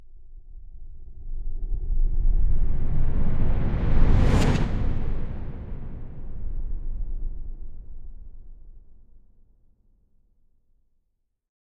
Deep large, smooth and complex one shot movement in an electroacoustic style. Made of edited home recording.